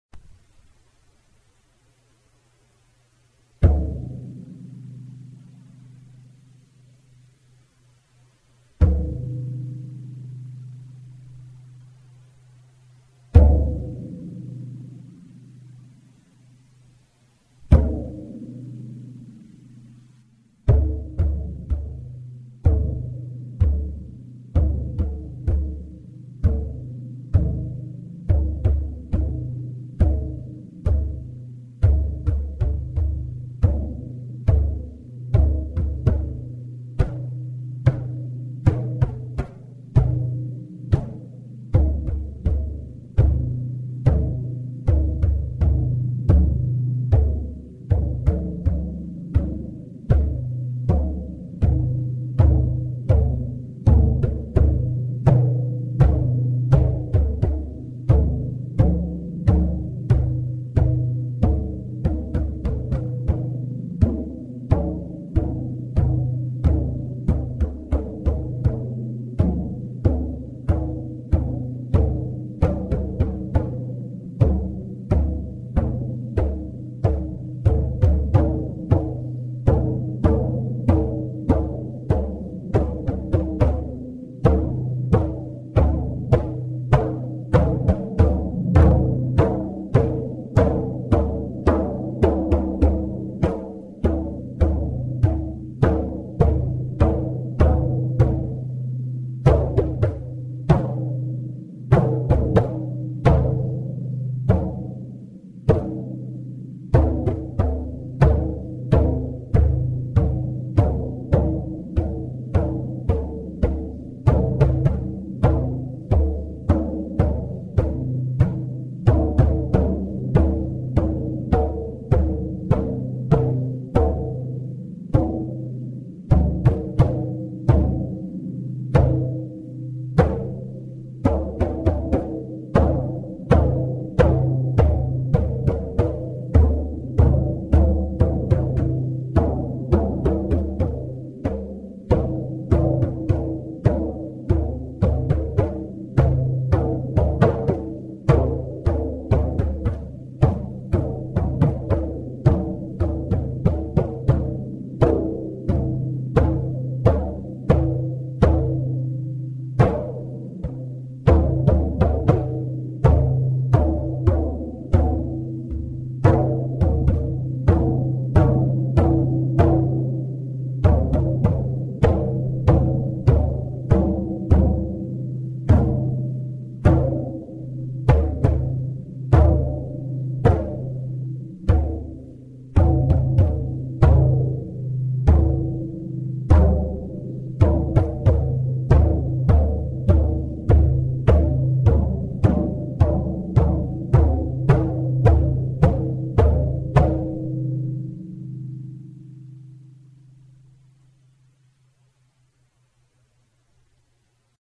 circular hand drum half volume slowed
When I came to the strange decision to try recording my poems as songs I looked for ambience around the house. This is a Native American hand held drum of octagonal shape
deep-sound, drum, percussion